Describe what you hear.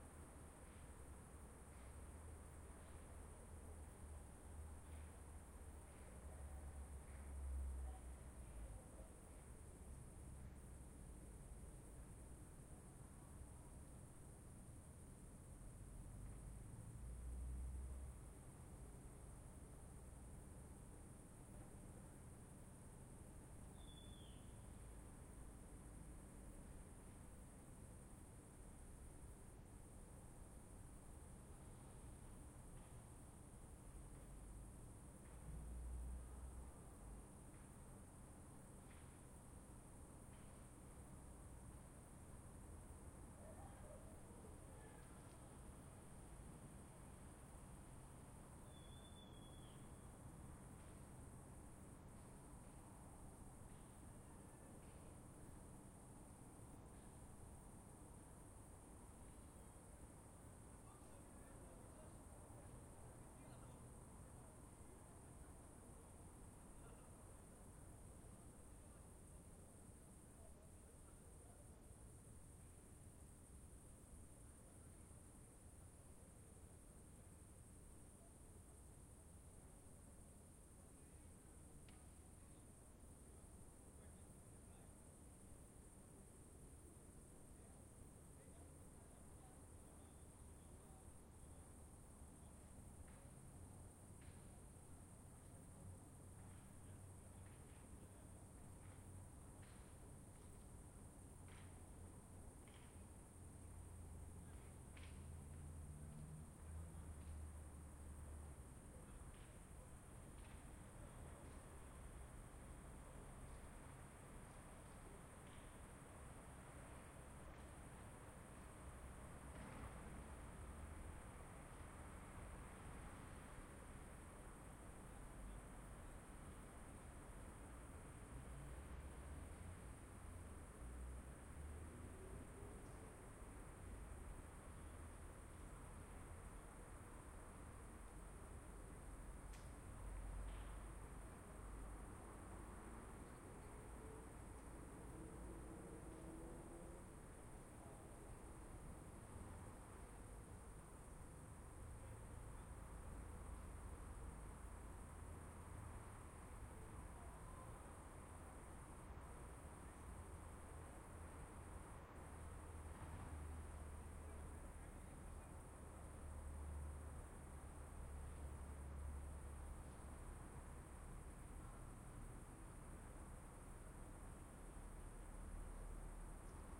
Trsat kampus crickets soundfield--16
soundscape in front of building in student campus Trsat: time near midnight Saturday
campus, student, midnight, saturday, soundscape, trsat